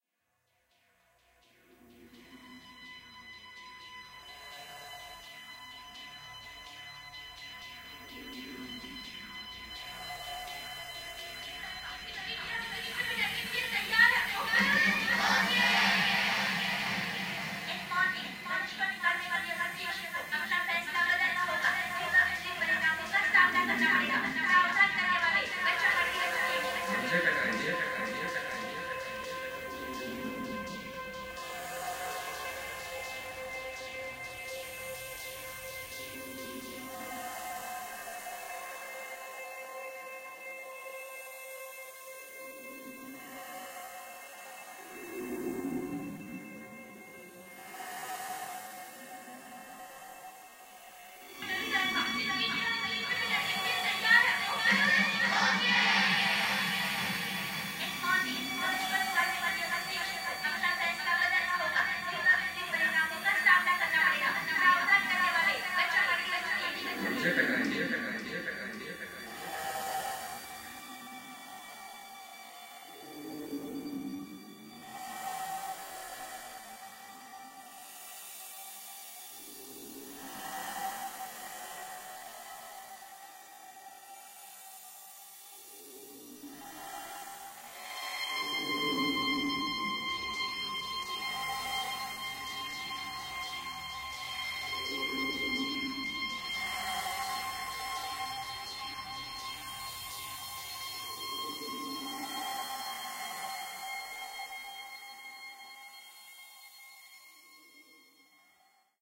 A kinda spooky and experimental ambient sounds, could be something specific or broad and there are different parts to single out or crop.
-Sputnik